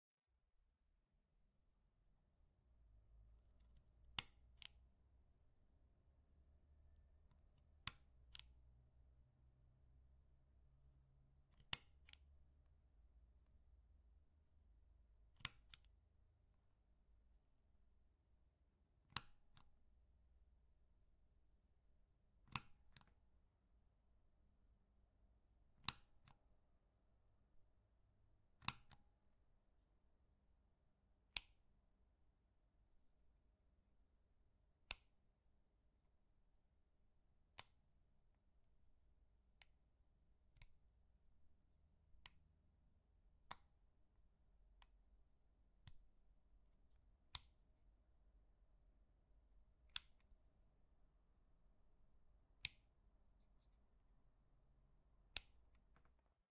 3 different stompbox switches recorded with Oktava MK-012. Not edited.